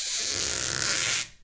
Squeaks made by running a finger across a stretched plastic grocery bag
squeak, bag, plastic